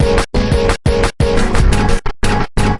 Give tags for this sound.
sliced; breakbeat; drumloops; glitch; hardcore; idm; acid; experimental; drums; processed; extreme; rythms; electronica; electro

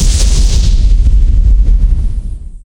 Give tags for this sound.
army; battlefield; deep; explosion; grenade; military; war

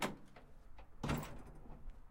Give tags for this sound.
door; exterior; opening; pickup; truck

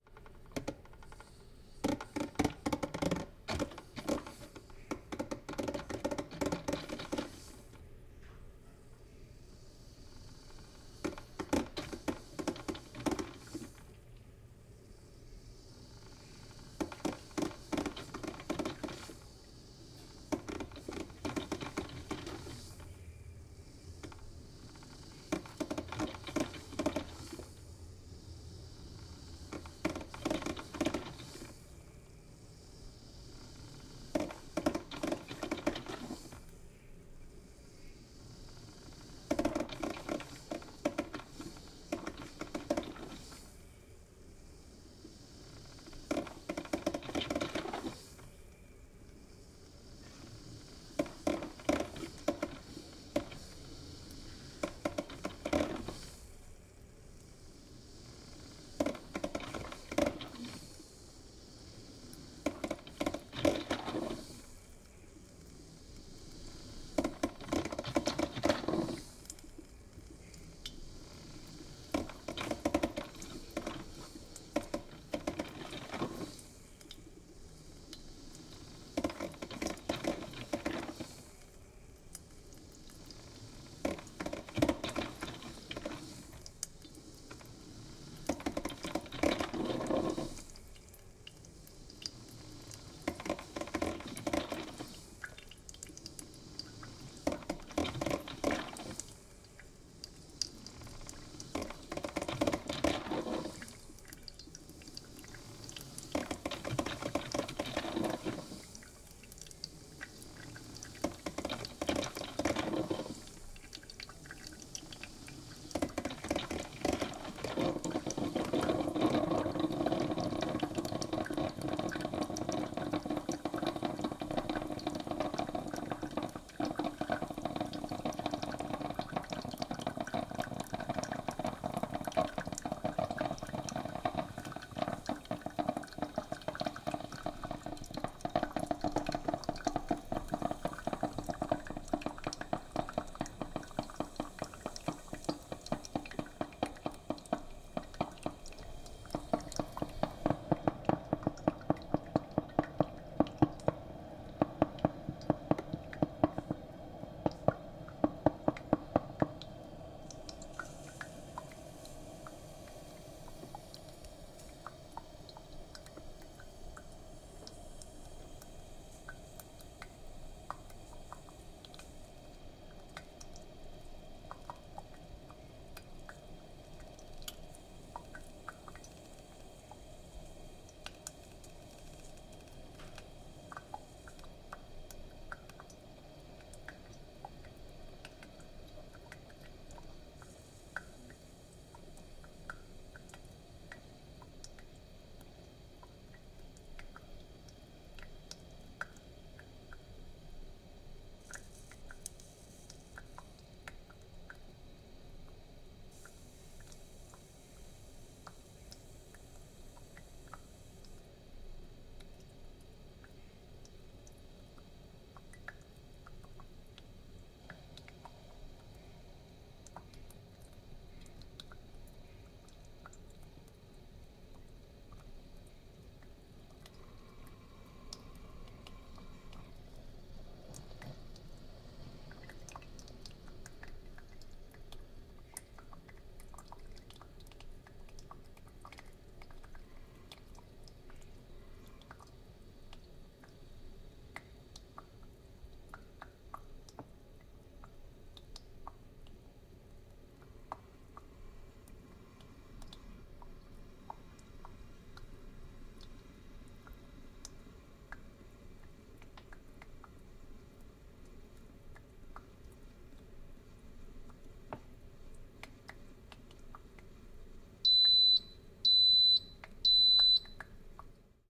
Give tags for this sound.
coffee; coffeemaker; brew; perkolate; beep; steam

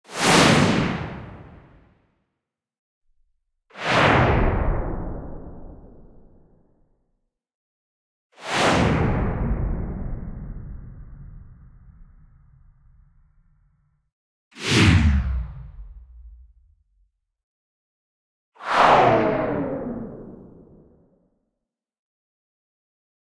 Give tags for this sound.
Swoosh; air; swash; swhish; swish; swosh; whoosh; woosh

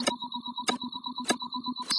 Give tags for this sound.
image; synth; noise; space

